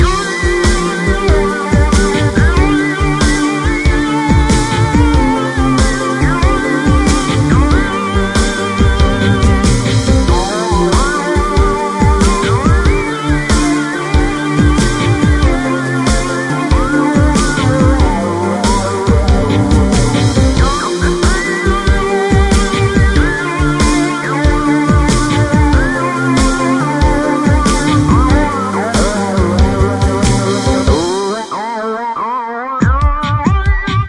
Loop Hard Working Alien 04
A music loop to be used in fast paced games with tons of action for creating an adrenaline rush and somewhat adaptive musical experience.
victory, war, Video-Game, gamedev, gaming, games, loop, music, battle, music-loop, indiedev, gamedeveloping, videogames, videogame, indiegamedev, game